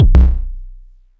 By Roy Weterings
I used this for making Early Frenchcore tracks in Ableton Live.